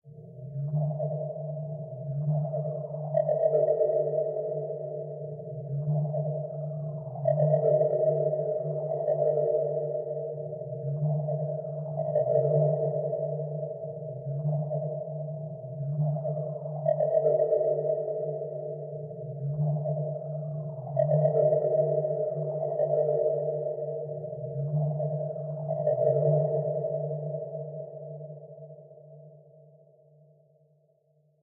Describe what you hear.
Could be an undersea creature or alien type. I was imagining some sort of echolocation. Enjoy!
Made in FL Studio 10